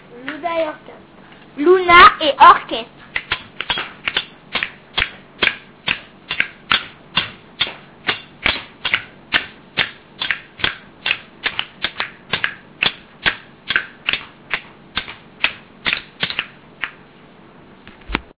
TCR sonicsnaps MFR luna-orchestre
Field recordings from La Roche des Grées school (Messac) and its surroundings, made by the students of CM1 grade at home.
france, sonicsnaps